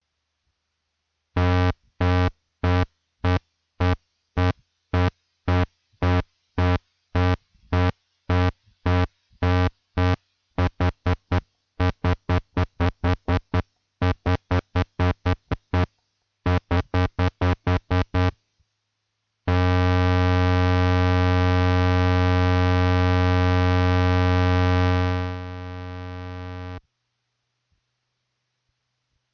Sounds from a Korg Monotron Duo.